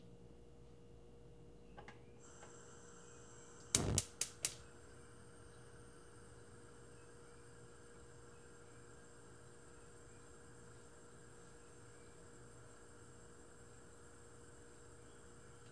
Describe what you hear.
Stove noise on encendido estufa appliances gas fire